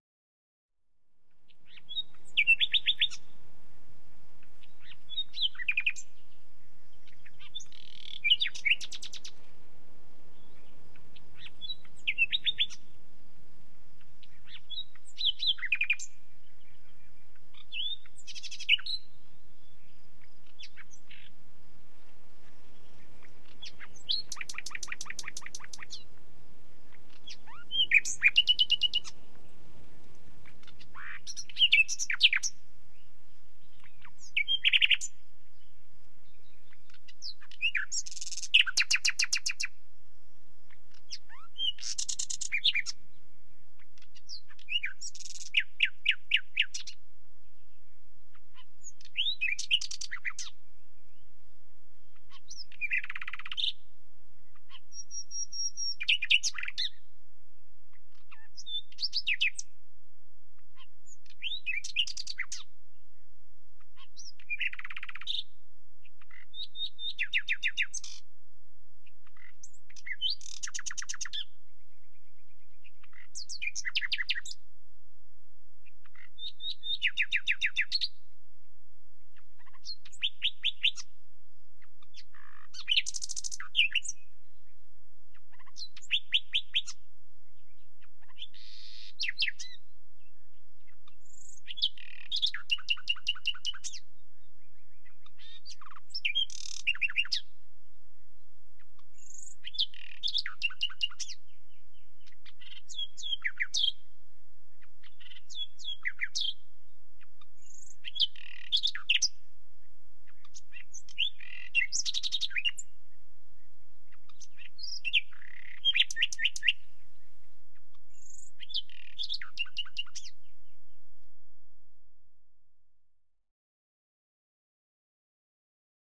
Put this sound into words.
Nightingale Denmark
Recording of thrush nightingales in Denmark.
field-recording, bird